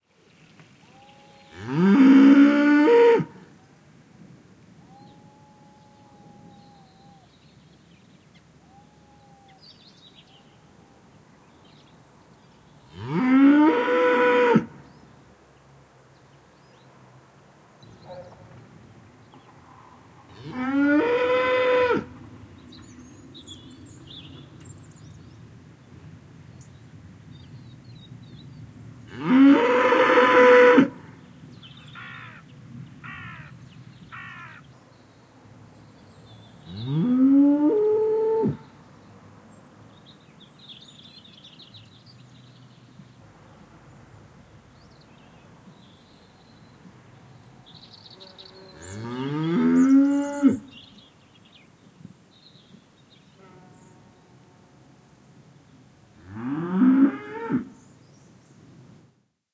Persistent Cow
A stereo field recording of a cow looking for her lost day old calf. The calf was curled up,hidden, fast asleep and oblivious to the commotion his mother was making. Rode NT4 > FEL battery pre-amp > Zoom H2 line in.
cow, lowing, moo, stereo